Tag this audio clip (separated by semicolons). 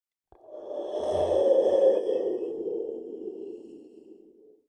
FLASH; TRANSITION; WOOSH